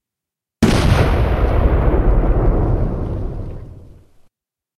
An explosion
Want to use this sound?
boom, explode, explosion